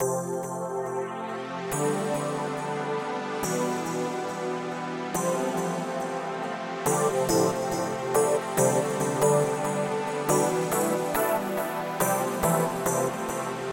Dance loop for house or trance songs Idk.